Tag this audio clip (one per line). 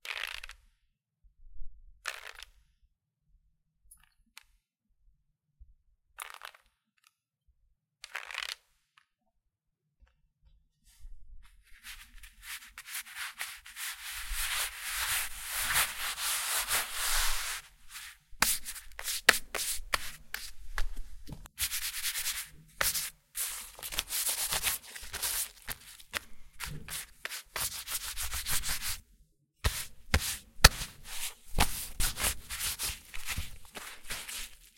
paper
wiping
spill
splash
water
spreading
smearing